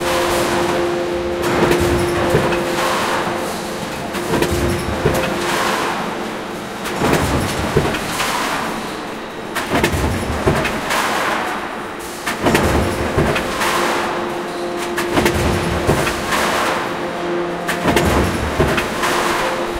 Field recording from Whirlpool factory in Wroclaw Poland. Big machines and soundscapes